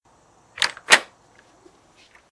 Door knob 001
door knob being turned.
door, knob, sound-effects, sound-fx